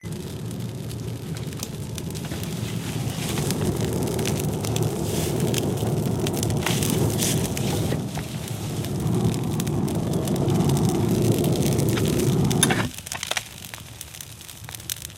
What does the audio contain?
somerset fire 2
recording of a fireplace in somerset
field-recording, fire, somerset